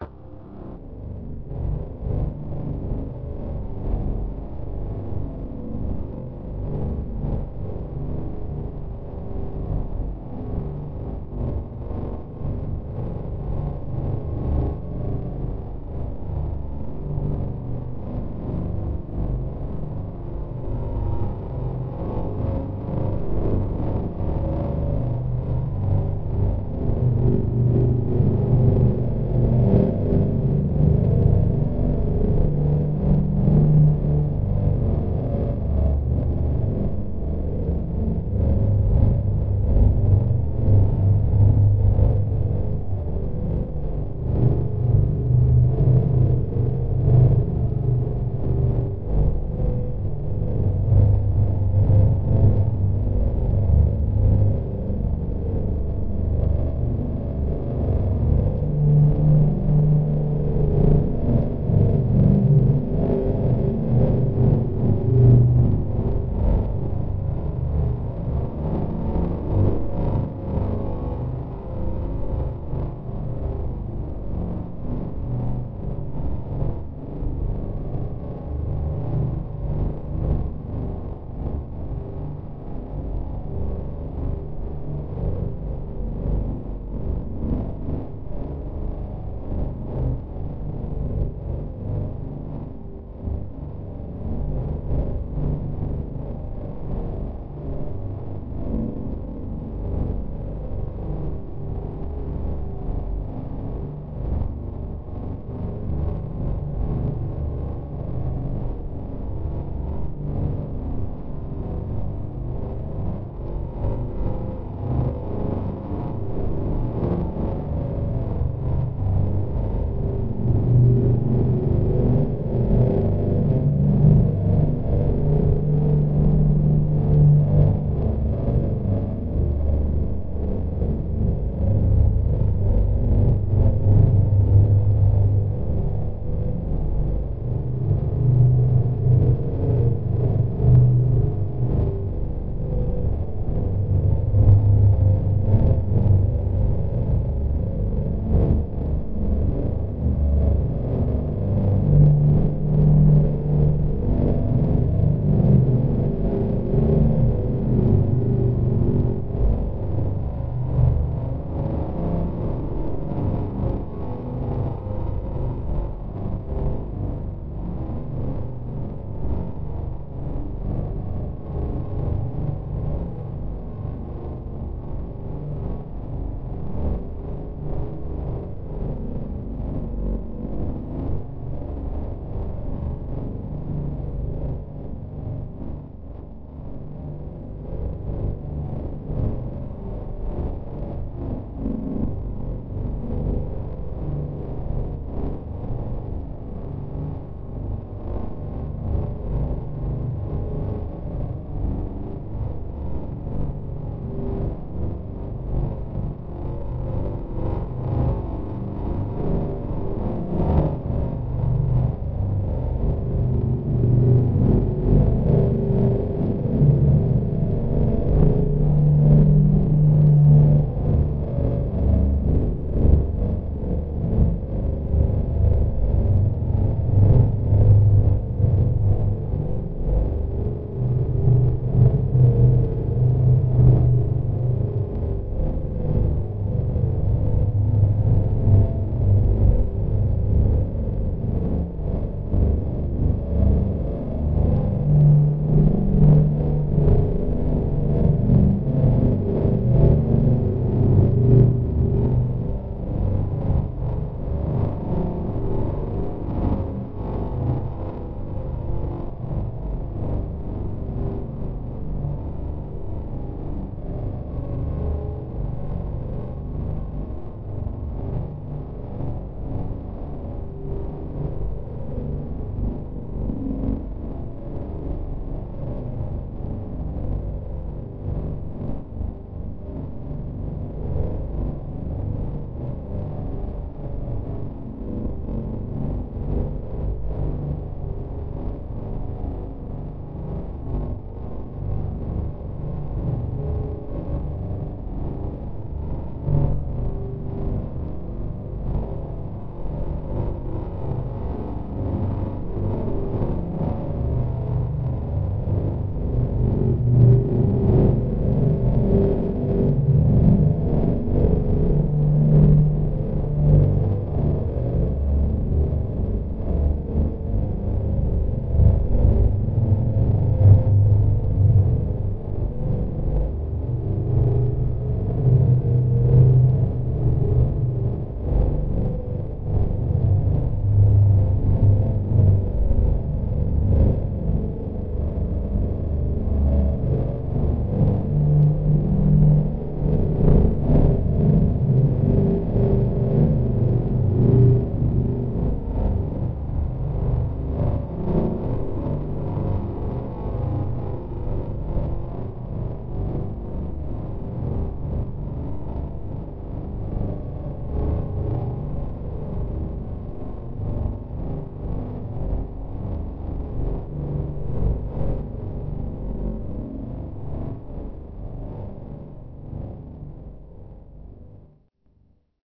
A pulsating softly bass sound depicting a UFO-like sound with some echoing tonal sounds that have a wavering harmonic range. This sound was created from manipulated waveform generated sounds. However, if you decide to use this in a movie, video or podcast send me a note, thx.
eerie, generated, otherworldly, sound, space, ufo, ufo-sound, waveform, weird